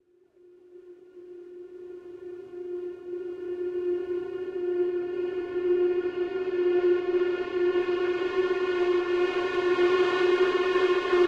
Rise Swell
Short swell-rise sound
air, cinematic, sound-design, suspense, swell, tension